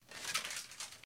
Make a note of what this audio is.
Rustle- plate armor. Recorded using 2x Shure SM-57's in a studio environment.
The armor used was a suit of home-made reconstruction Lorica Segmentata, standard issue of the Roman Army in the 1st-3rd centuries CE roughly. The plates are slightly thinner than the actual armor, but it provides a relatively close sound.